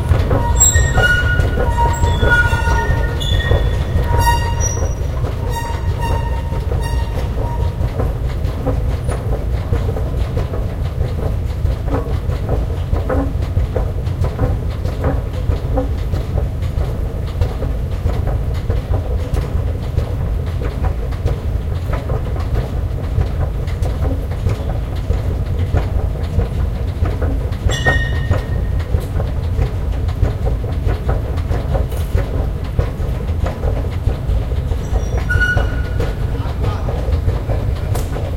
A close up recording of the escalator at Nation RER station in Paris. Very squeaky at the beginning, turning into a nice rumble.